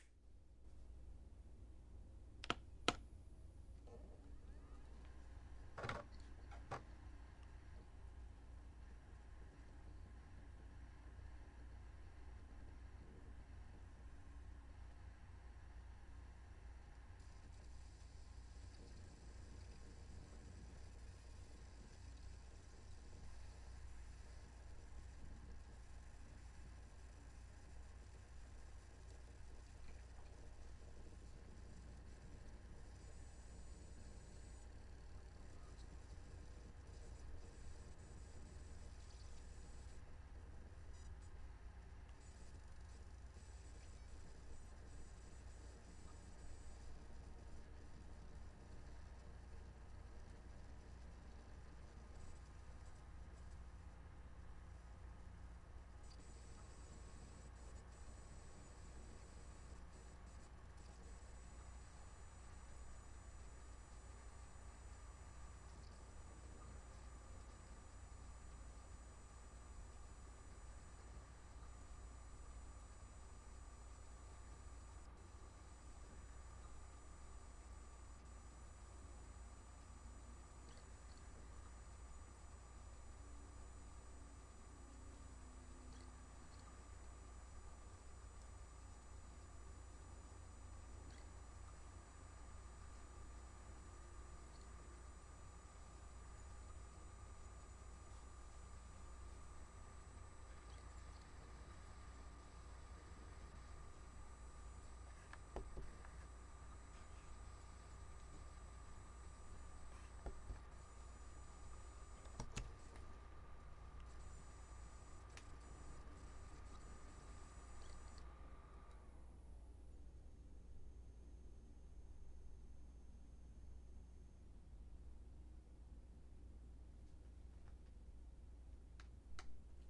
I recorded the boot cycle of my laptop, and the shutdown process.
Equipment: SD552 & Sennheiser MKH50
Laptop, fan, Power, up